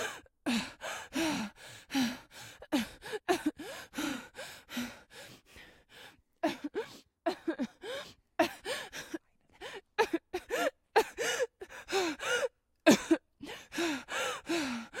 woman run and breath
breathe, female, human, run, breathing, breath, heavy, pant, panting, running, woman